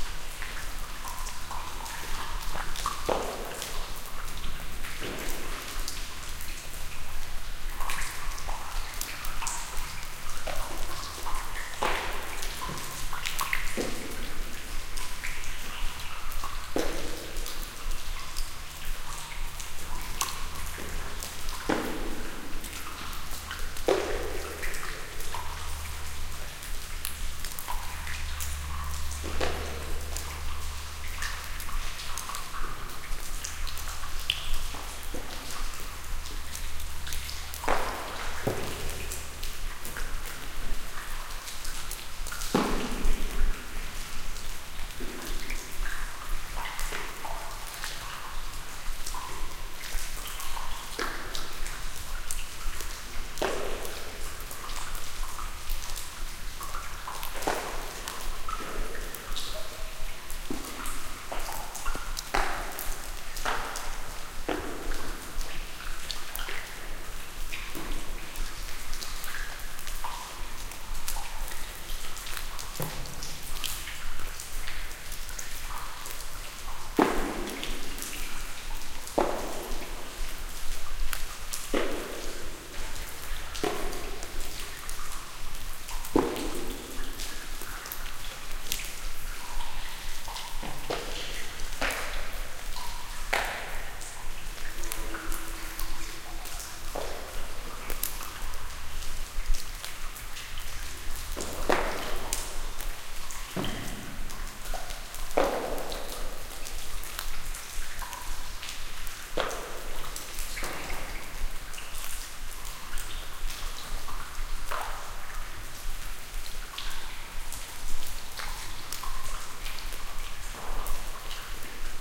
Quarry Tunnel Ambience 3, Echoey Wet Droplets
I am standing in front of a large pool of water. Water falls from the ceiling and makes loud, wet splashes as it hits the surface.
drop, water, cave, droplets, tunnel, mine, ambience, dark, wet, drip, quarry